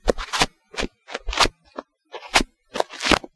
moving stuff and my mic around